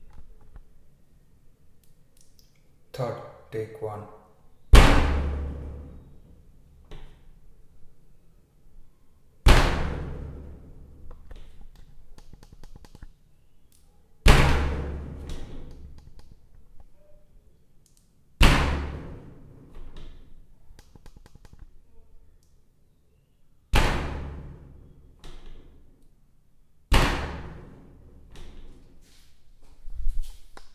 BANG ON DOOR
Banging on a Fiber bathroom door. Bathroom reverberate. Recorded on Zoom H4N Pro
bang, knock, pound, Impact, Boom, Hit